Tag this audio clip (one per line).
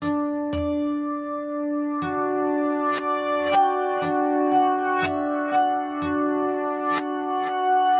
8-bit
awesome
chords
digital
drum
drums
game
hit
loop
loops
melody
music
sample
samples
sounds
synth
synthesizer
video